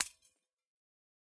Large glass ornament smashed with a ball peen hammer. Close miked with Rode NT-5s in X-Y configuration. Trimmed, DC removed, and normalized to -6 dB.